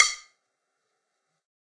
Sticks of God 021
god, real, stick